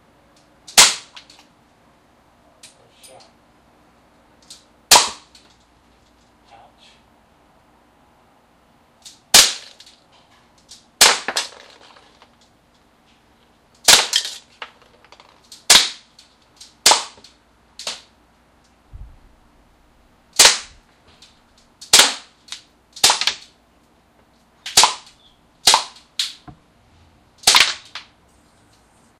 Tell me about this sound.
Shooting a raquetball with the 15XT recorded with DS-40.